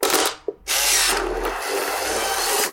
This ambient sound effect was recorded with high quality sound equipment and comes from a sound library called Cameras which is pack of 100 high quality audio files. In this library you'll find shutter sounds of 20 different cameras along with other mechanical sounds, including flashes, rewinding film in analogue cameras and more.